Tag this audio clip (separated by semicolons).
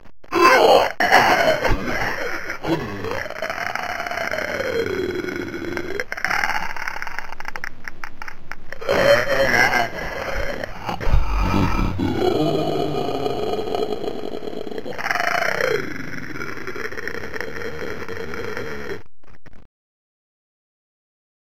moan; sfx; voice; zombie